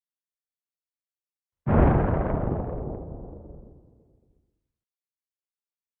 Synthesized Thunder 08
Synthesized using a Korg microKorg
synthesis, thunder, weather